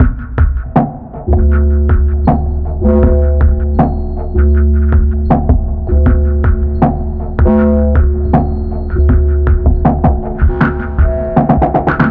This is part of a remix pack of a small selection of beats by Brian Transeau beats, Available in the 'One Laptop Per Child / Berklee Sample Poo'l.
Inspired by the rhythms i wanted to create some beat downtempo beats starting from faster loops. So, remix consist in additional processing that give at least that oldschool triphop feel: each beat was pitched down, filtered hp-lp, reverberated or delayed, distorted/phattened/crushed, normalized to -0.1
beats, hiphop, club, oundesign, drum, processed, producer, hop, dj, trip-hop, chill, triphop, electro, slow, downbeat, phat, remix, beat, chillout, hip, filter, downtempo